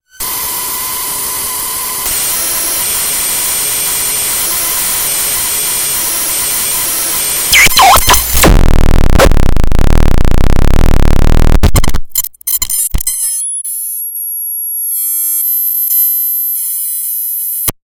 tmtr fdbk
The clip was created using a Synsonics "Terminator" guitar with a built-in amp by running the output to my sound card and sound card's output going into to a secondary jack intended for headphones on the guitar. It created an interesting metallic noise, almost like a ring mod... There is a segment where I was poking the guitar's amp circuit board with my fingers which creates lots of grumbling/squelchy noises... The guitar is kind of crappy, but it makes a pretty neat noise synth! :B
guitar, noise, synsonics, terminator, bending, feedback, circuit